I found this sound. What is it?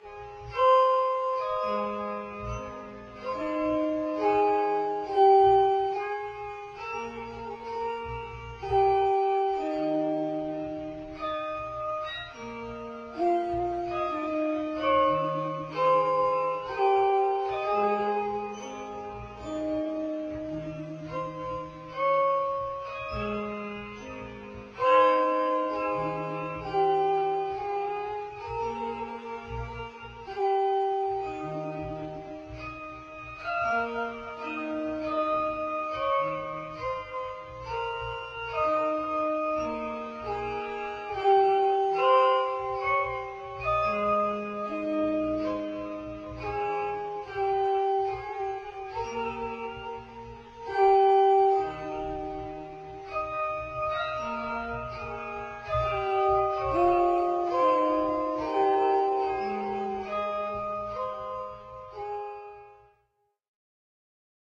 Music Box2(1)
A collection of creepy music box clips I created, using an old Fisher Price Record Player Music Box, an old smartphone, Windows Movie Maker and Mixcraft 5.
Antique, Chimes, metallic, Music-Box